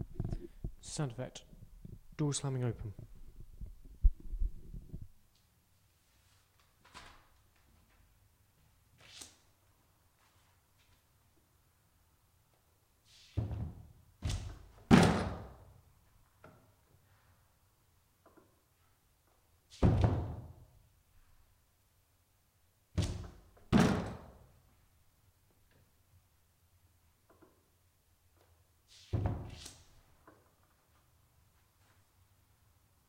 door slaming open
close, door, doors, open